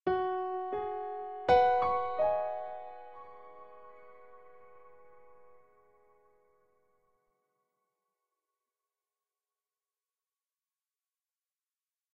Piano Sample
music, piano, production, sample